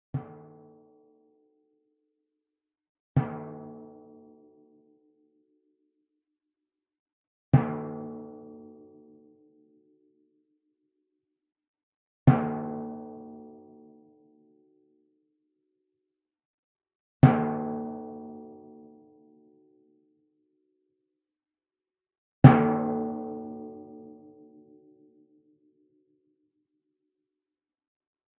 timpano, 64 cm diameter, tuned approximately to D#.
played with a yarn mallet, about 3/4 of the distance from the center to the edge of the drum head (nearer the edge).
drum, drums, flickr, hit, percussion, timpani